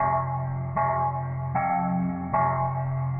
Cellphone callsignal 1
Recorded different signals from my cellphone Edited. ZOOM H1.